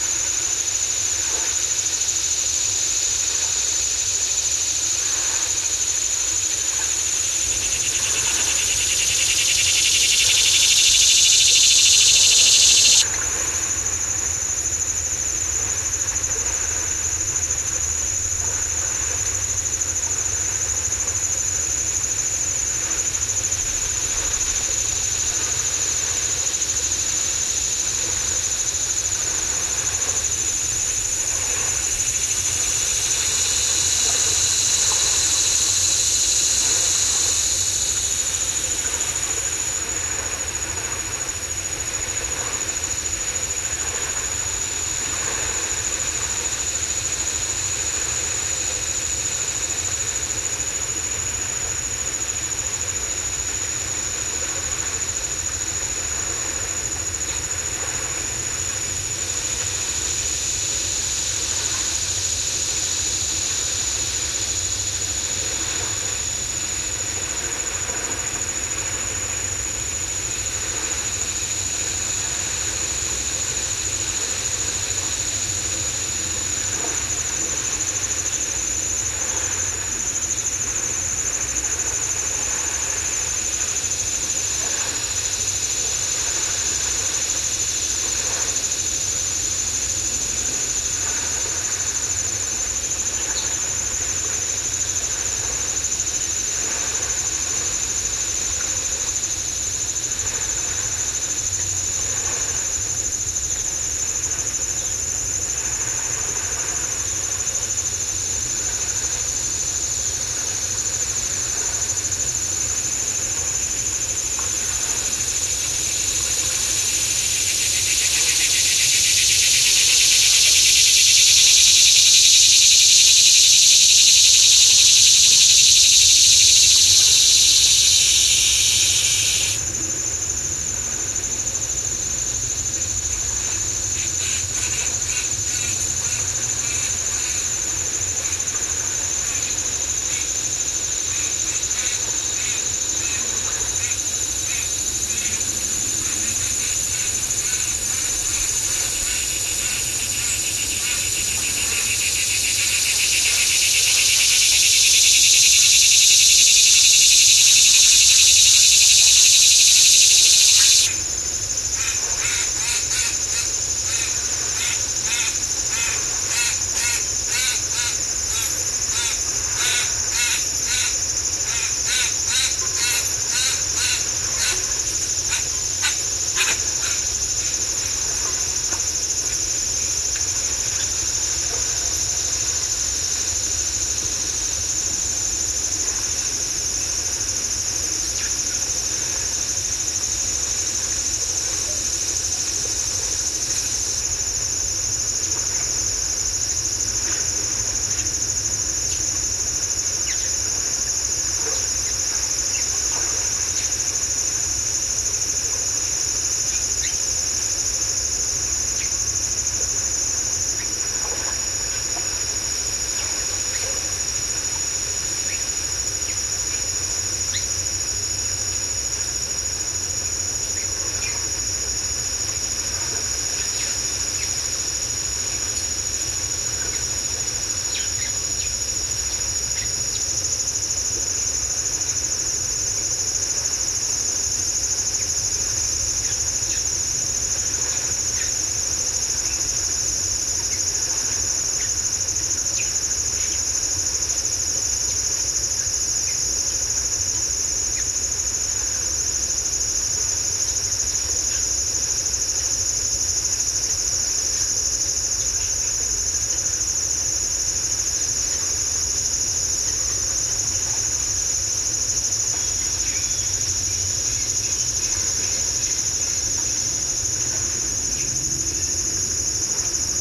bugs & waves & birds (& low hum from the city)